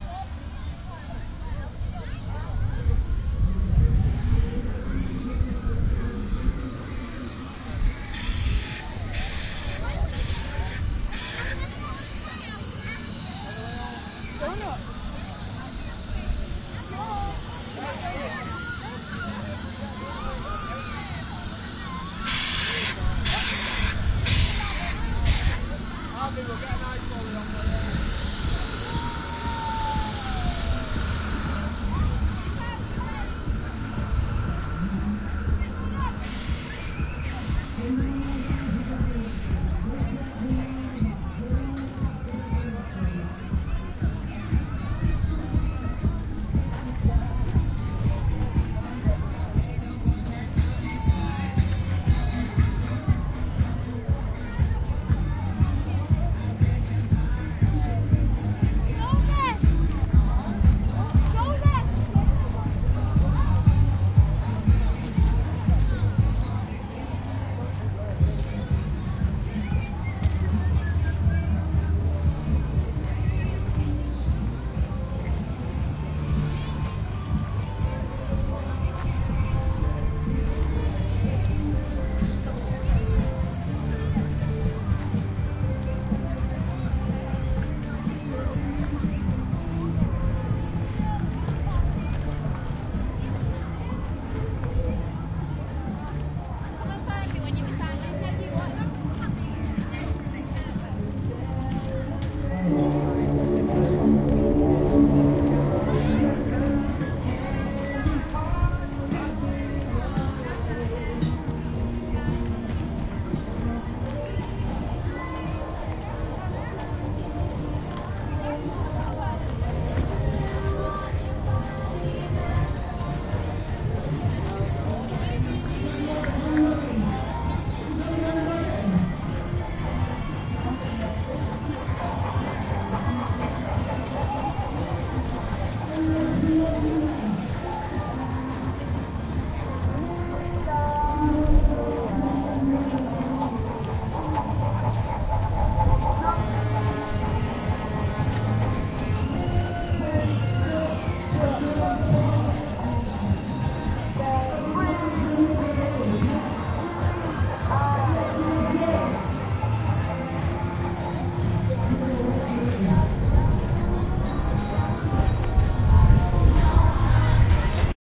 Funfair Atmosphere 1 - Heaton Park
Funfair Atmosphere - Heaton Park, Manchester. April 2011
atmosphere, heaton, crowd, funfair, park, people